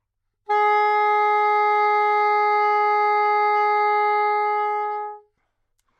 Sax Soprano - G#4
Part of the Good-sounds dataset of monophonic instrumental sounds.
instrument::sax_soprano
note::G#
octave::4
midi note::56
good-sounds-id::5551